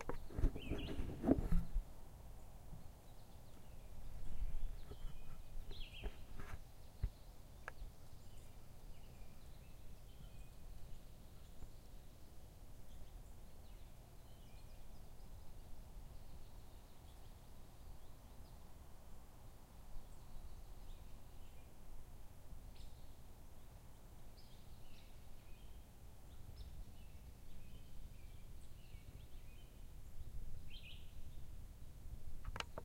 Just some quiet bird sounds recorded with Zoom H4n recorder.